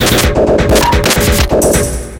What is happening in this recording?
Ambient noise loops, sequenced with multiple loops and other sounds processed individually, then mixed down and sent to another round of processing. Try them with time stretching and pitch shifting.
abstract, ambient, industrial, loop, noise, processed